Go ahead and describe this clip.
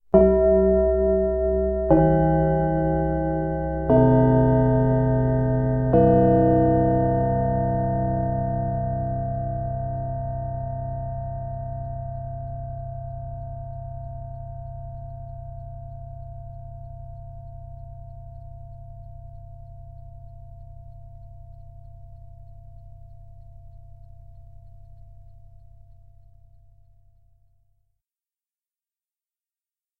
Gong Pause 1x
This is a real 1960's Wandel & Goltermann electromechanical four-tone gong. Gongs like this were in use in the PA systems of German public buildings like schools and theaters to indicate begin and end of pauses. Recorded directly (no microphone) from its internal magnetic pickup. Four tones descending.